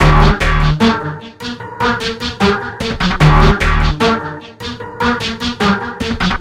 A JPOTTER sound that has a Techno kind of feel to it with a sort of dark edge and a surprising bass.
-JPOTTER
BASS, CLUB, COOL, DARK, EDGE, FAST, GOTH, GOTHIC, JAMES, JAMESPOTTER, JPOTTER, LOOP, POTTER, RAID, SOUND, SYNTH, TECHNO, TECHY